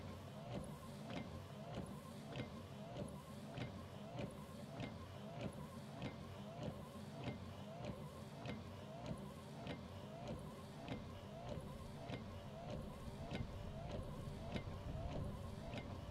Car Wipers Interior
Interior recording of car wipers.
shield, wipers, wind, car, mobile, auto